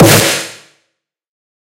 this is the second Snare have fun!